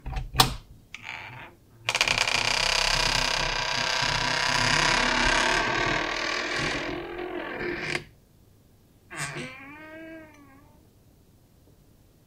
creepy door opening
creepy, door, horror, opening, sinister, spooky